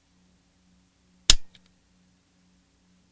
Dry Fire
Dry firing a Smith and Wesson 9MM.
Empty, Fire, Pistol, Shoot, Smith-Wesson